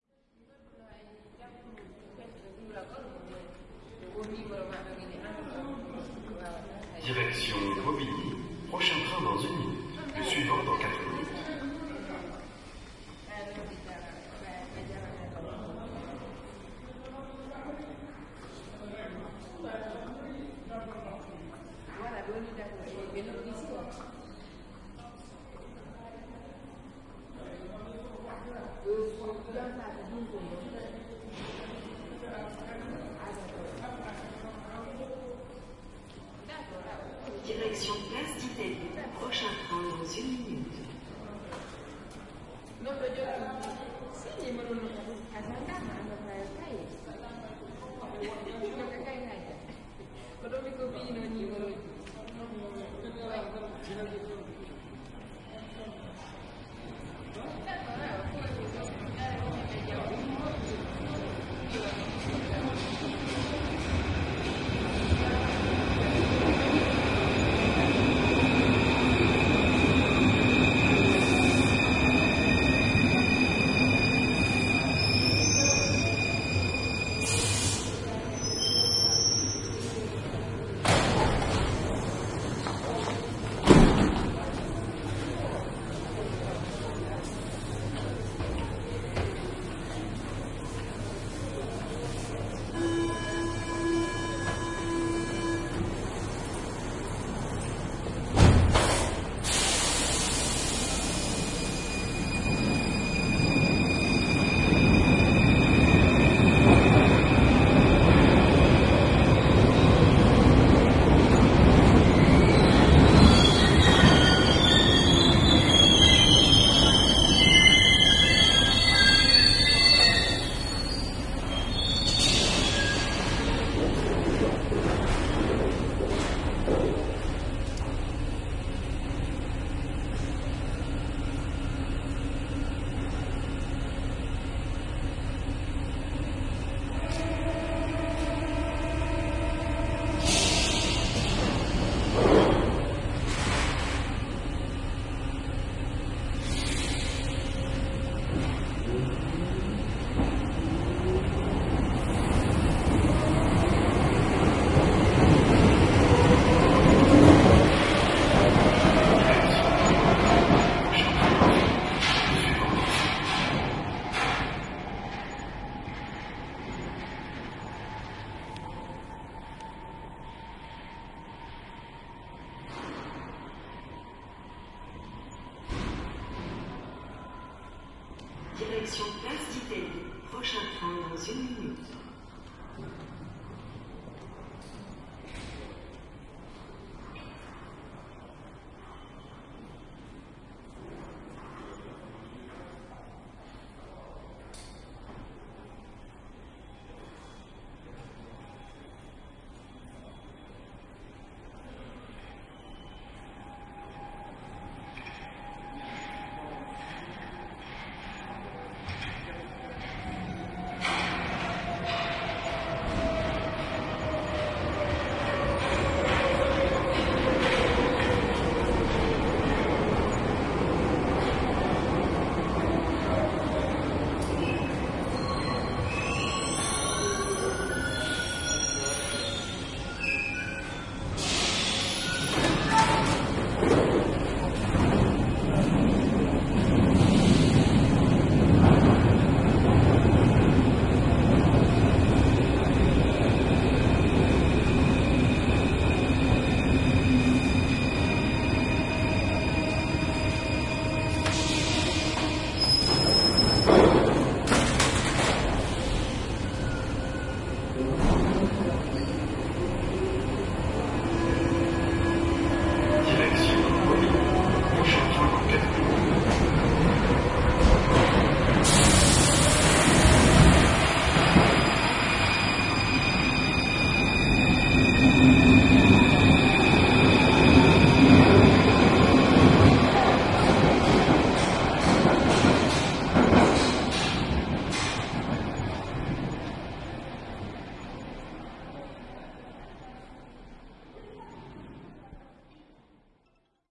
ambiance metro
5 minutes of the life of a subway station in the outskirts of Paris, France. People talking, trains arriving, doors opening, closing, trains leaving... Recorded with a zoom h2n.
field-recording, France, metro, Paris, station, Subway, train, tube, underground